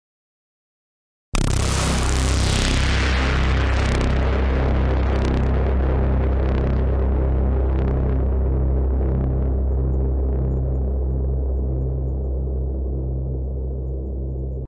Sound effect I made in Reason 4 with Subtractor and Advanced reverb nothing else.